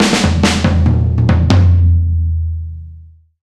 acoustic fills sound-effect